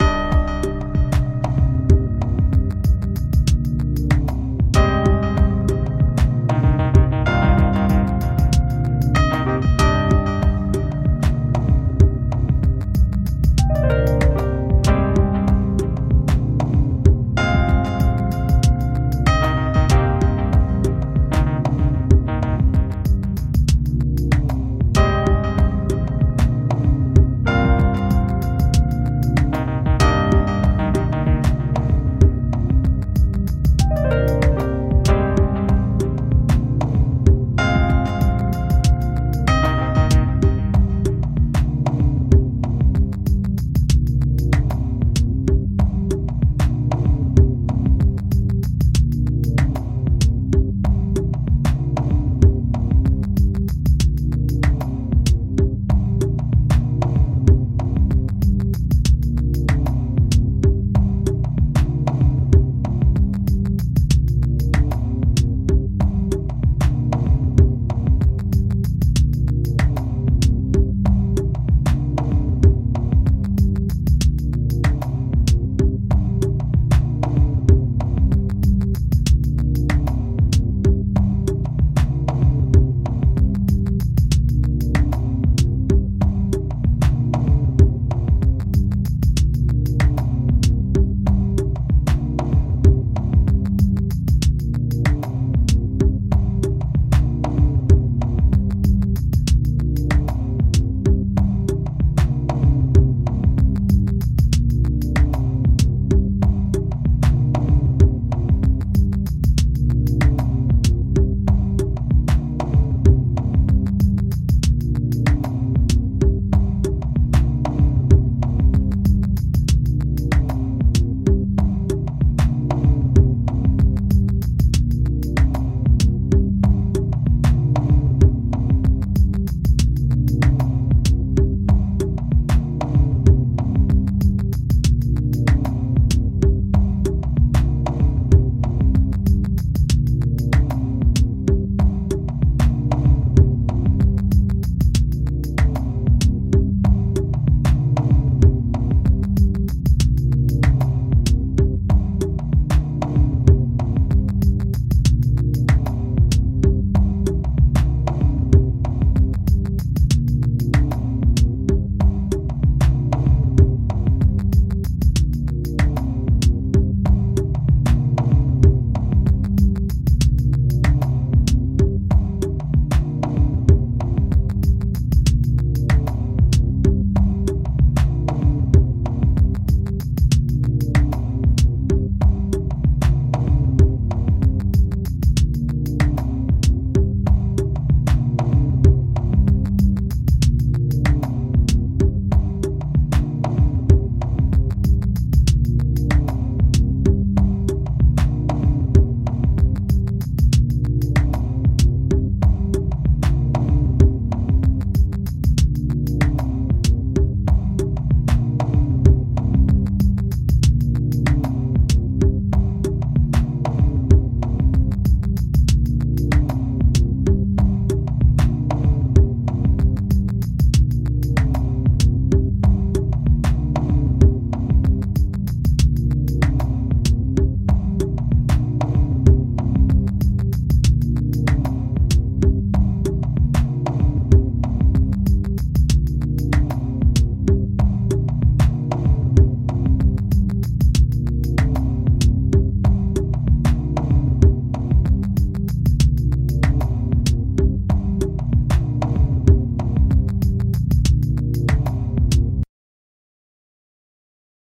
04 pollie soft
this is one from when i first got into digital music.
i "penciled" in midi graphs, and manipulated other samples from a buddies sound bank, thus creating... Pollie Soft.
i love the mumbling bass rhythms in the background under the subtle jazz hits, maybe?
loop, midi, original, piano, sample, soft